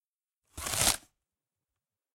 S Tear Tape
tearing off packaging tape
packaging, tear, tape, peel